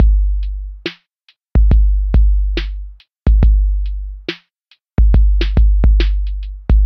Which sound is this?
Drumloop Lo-fi base 2 - 2 bar - 70 BPM (swing)
Beat with old electronic elements (808)...
70-bpm, 808, beat, drum, drumloop, electro, loop